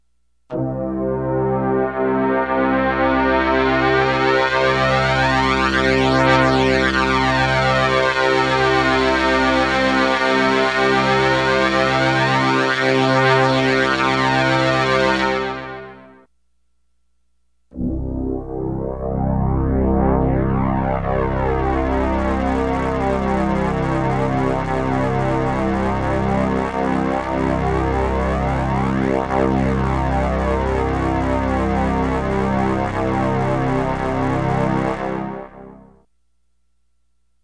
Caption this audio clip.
2 synthesised rising drone sweeps
analog, keyboard, multisampled, synthesised